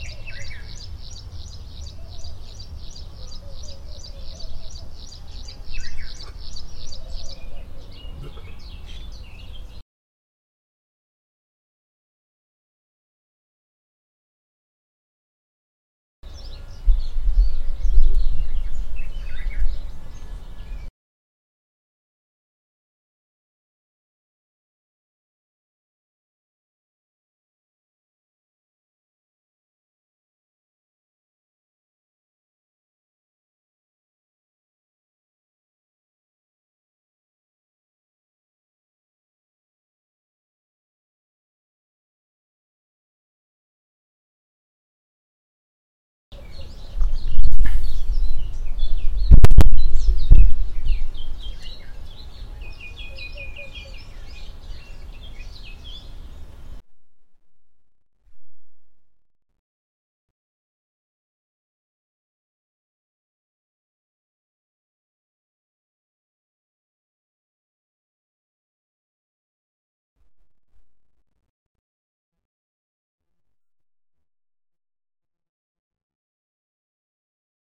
ambiance glitches
weird Israel poot sounds
poot, weird, Israel